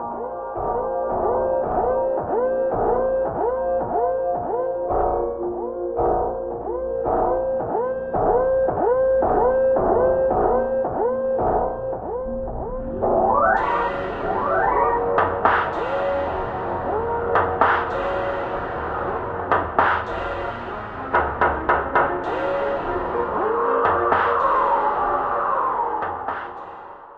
Party Tonight
A Club Scene going wrong.
action, mysterious, mystery, pulsating, strings, Suspenseful, swelling, Thriller